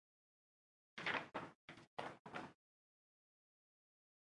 5. papel volando2
papel volando foley